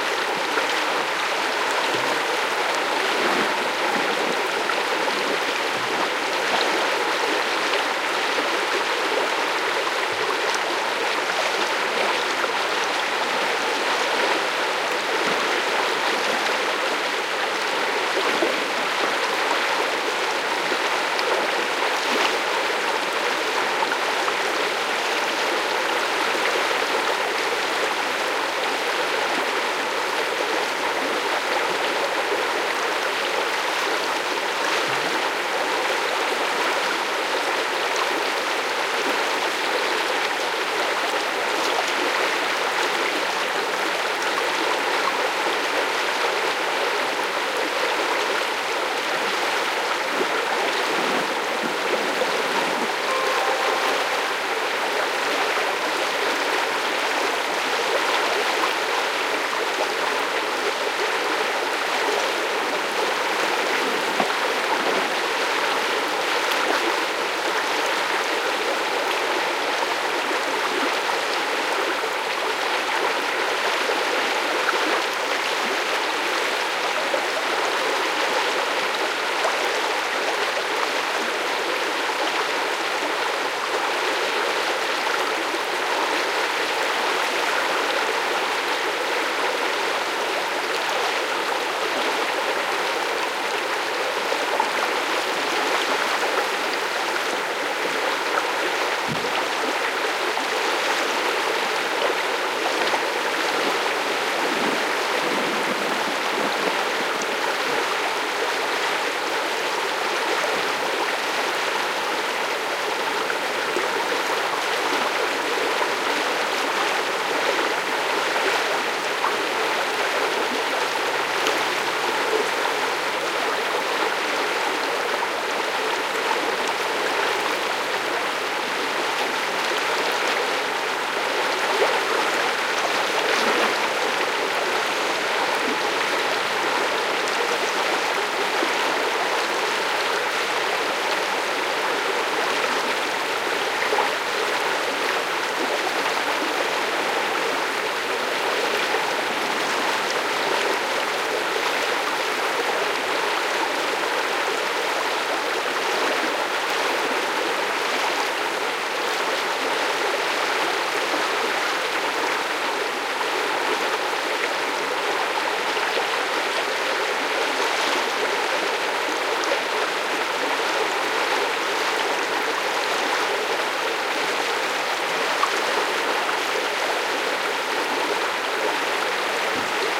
Small river noise, recorded at Tromso, NOrway. Primo EM172 capsules inside widscreens, FEL Microphone Amplifier BMA2, PCM-M10 recorder
ambiance, stream, nature, river, norway, field-recording, creek, water